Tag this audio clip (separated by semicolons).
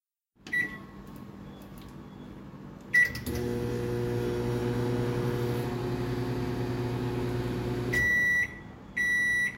Heating-Up-Food; Kitchen; Machine; Microwave; Warm